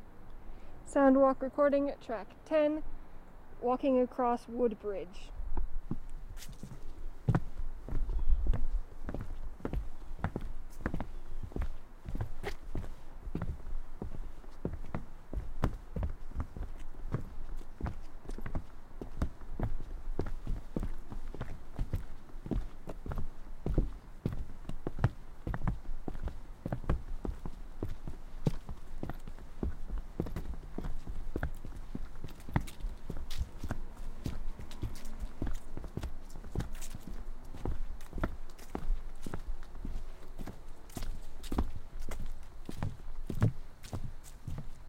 Sound Walk - Walking over Wood Bridge
Footsteps walking over a wooden bridge